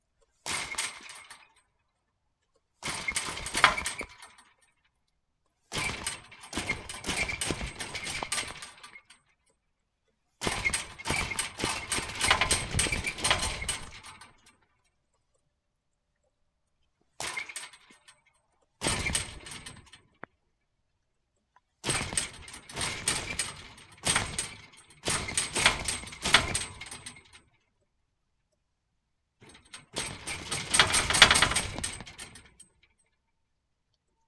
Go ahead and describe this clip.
Pushing a metal fence
Hitting an iron fence softly and then hard.
metallic, clang, impact, fence, metal, iron, hit